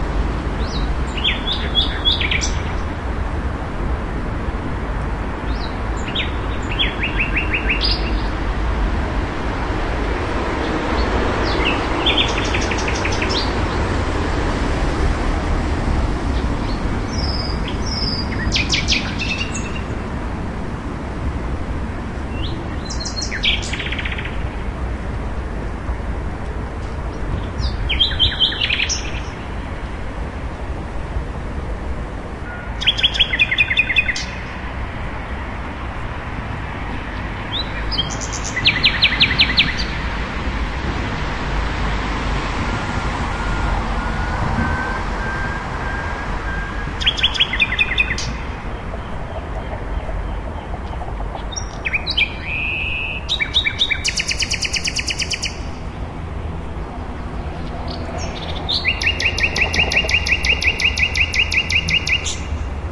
Berlin city park side road night ambience.
Recorded with Zoom H2. Edited with Audacity.